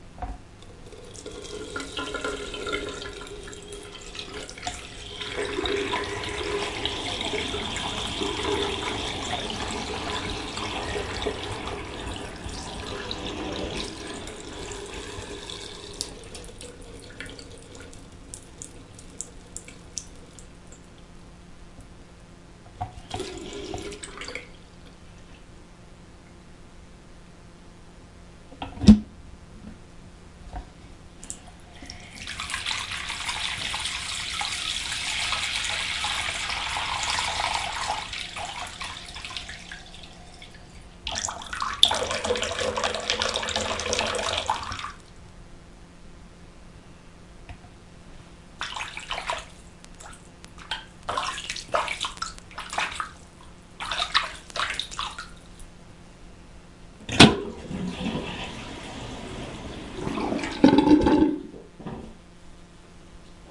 sink water
The sound of a sink being filled and emptied.
ambience, tap, field-recording, sink, fawcett, atmosphere, sound-effects, water, drip, plug